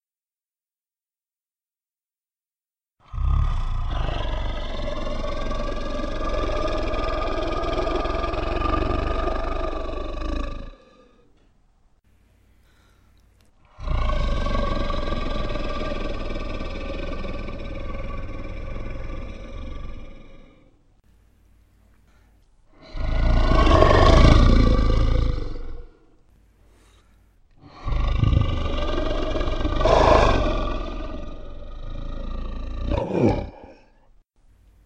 Four monster growls. Made with Audacity and my own voice, lowered two octaves, slowed 50%.